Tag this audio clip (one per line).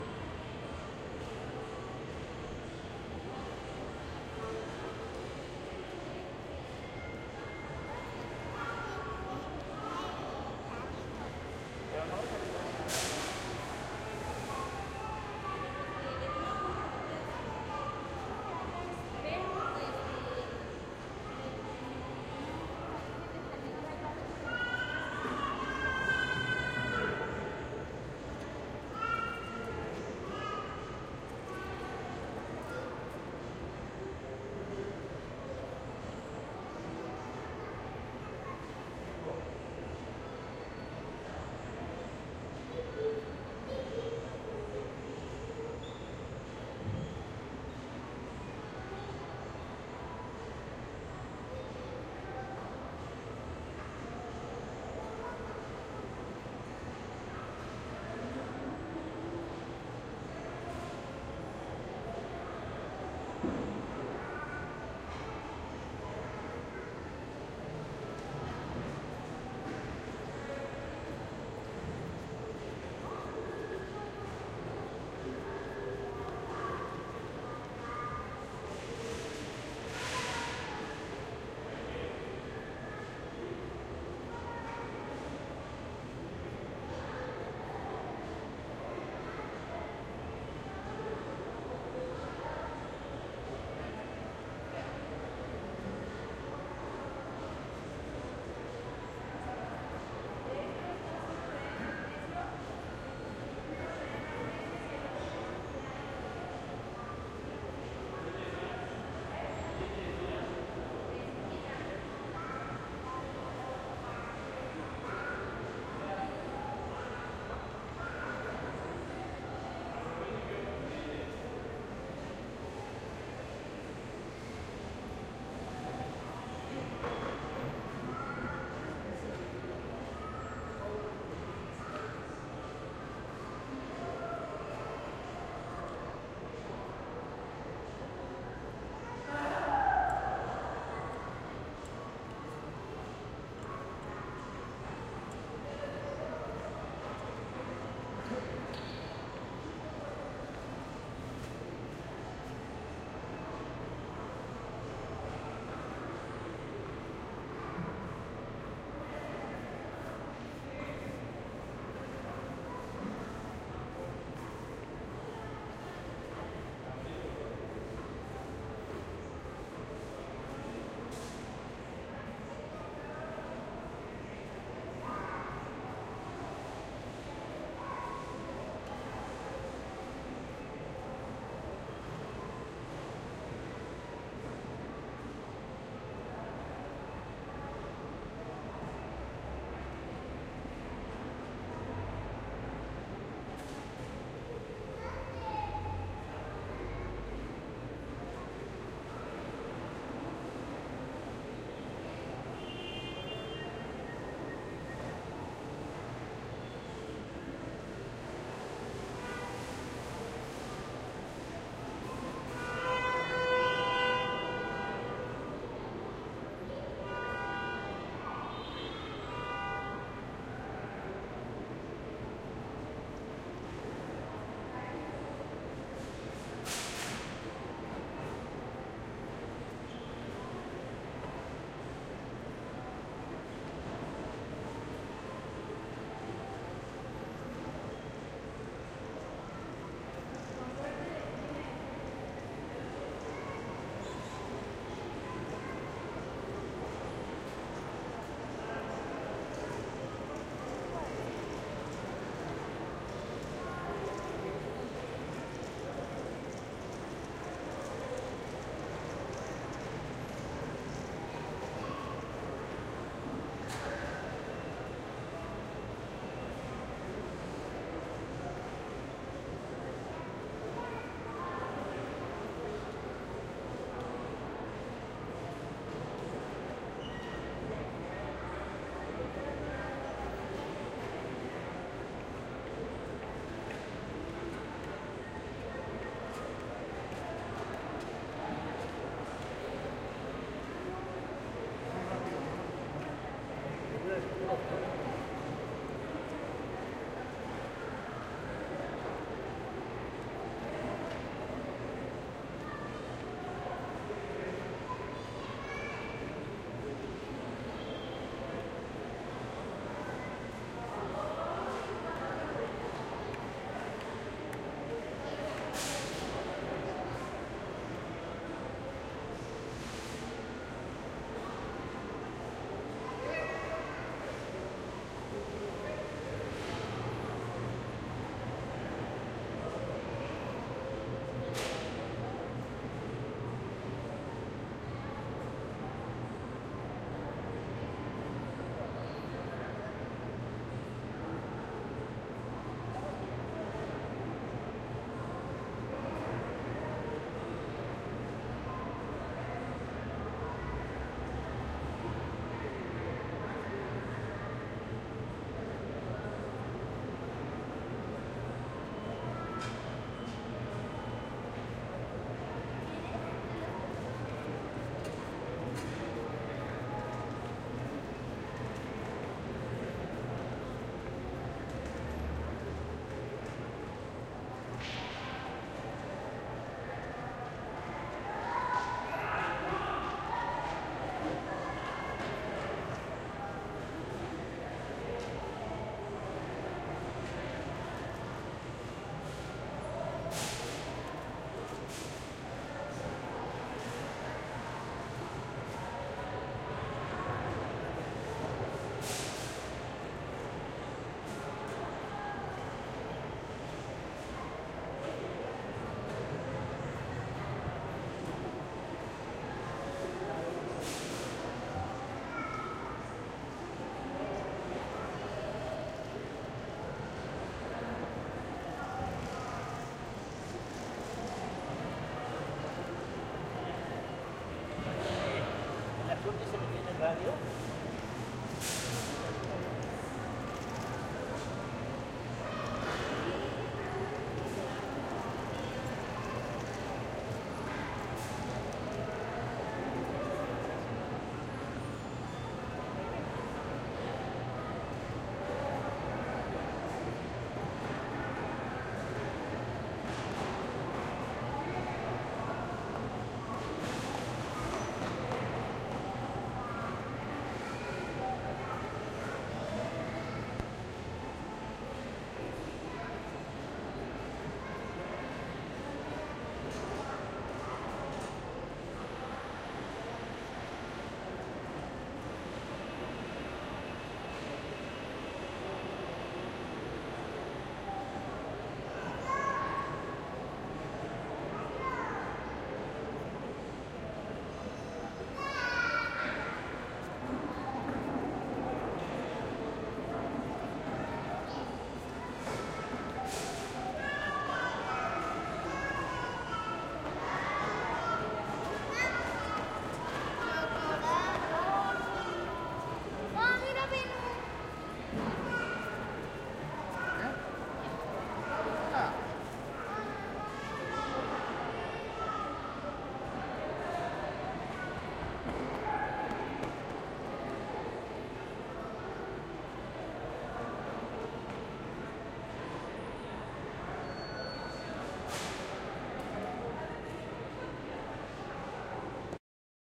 voices
Mexico-City
walking
kids
chat
chatting
talking
Mexico
crowd
field-recording
corridor
Mall
people